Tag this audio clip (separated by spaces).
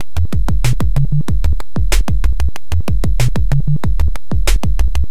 94bpm beat cheap distortion drum drum-loop drums engineering loop machine Monday mxr operator percussion-loop PO-12 pocket rhythm teenage